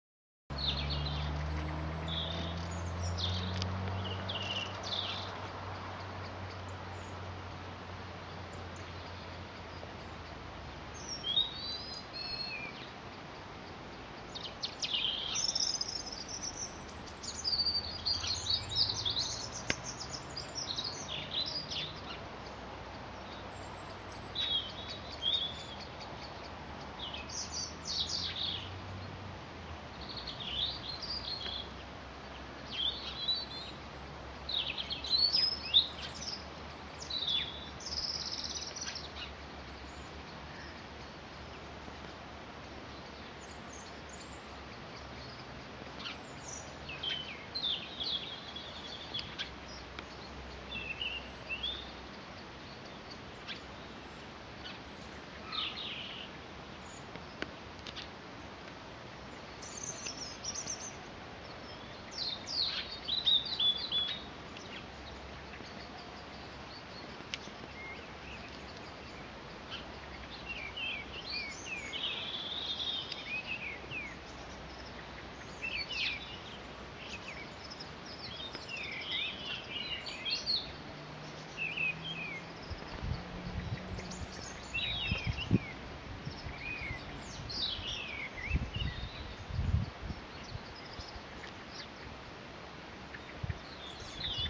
Robin&faint blackbird P1070028
Mid February near sunset in an English country lane, a brook nearby, robin song, then a blackbird makes its first, faint attempt at singing in the distance. Extracted from a video taken with a Panasonic TZ-8 camera.
robin, country-lane, England, birdsong, rural, field-recording, late-winter, ambience, early-evening, blackbird, brook